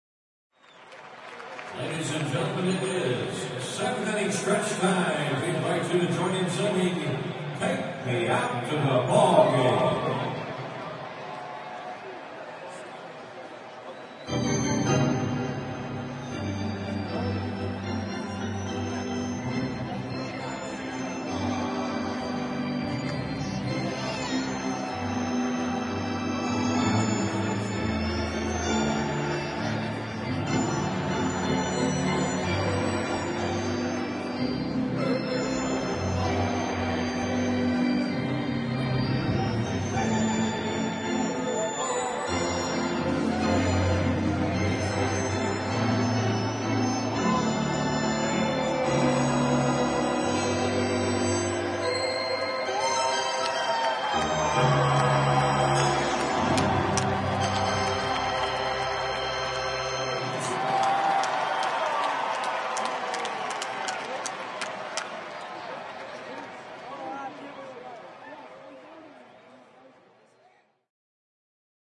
WALLA Ballpark Organ Take Me Out to the Ballgame
This was recorded at the Rangers Ballpark in Arlington on the ZOOM H2. Announcer introduces the organ playing 'Take Me Out to the Ballgame'.
ballpark, baseball, crowd, field-recording, music, organ, sports, take-me-out-to-the-ballgame, walla